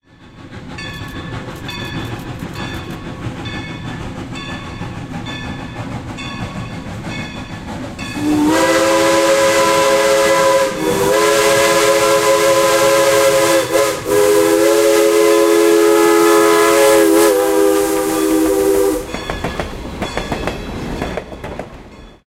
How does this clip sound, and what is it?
Steam Train at Crossing
New Hope & Ivyland Railroad 40 approaches a crossing blowing its whistle and ringing its bell.
steam-locomotive, trains, steam-train